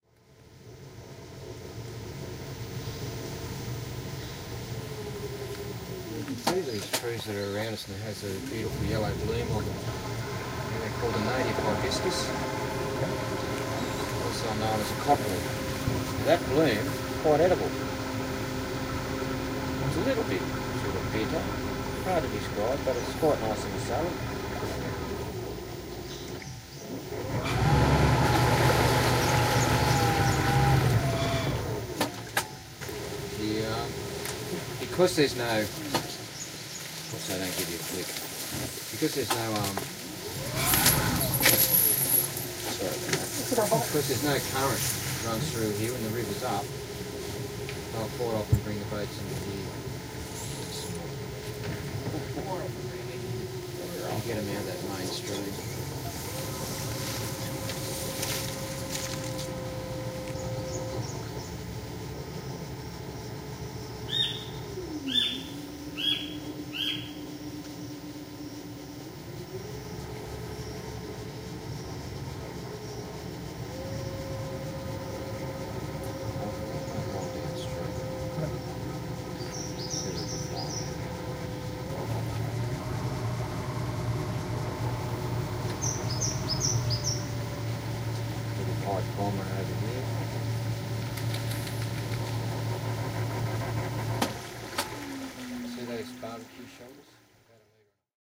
Daintree Electric Boat Tour Exerpt
This is a short excerpt from an 'Electric Boat' tour on the Daintree River north of Cairns Australia. The tour guide can be heard explaining some of the flora and fauna. This tour passes through some of the rainforest (that can be heard scraping on the hull), you may get lucky and see a crocodile, we did, great tour!
boat, speech, australian-accent, daintree-river, male, voice, tour, field-recording, binaural